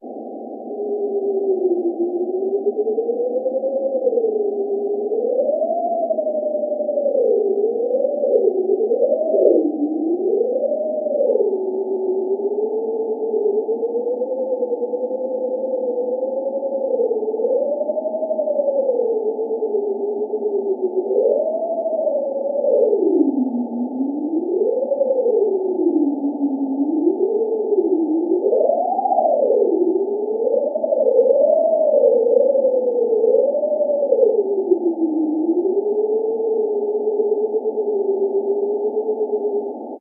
Created with an image synth program, these are modified images of brainwaves set to different pitch and tempo parameters. File name indicates brain wave type. Not for inducing synchronization techniques, just audio interpretations of the different states of consciousness.
image, sythesized